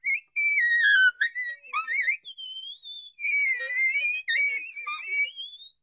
sax whistle
sax studio recording sample
sax, recording, whistle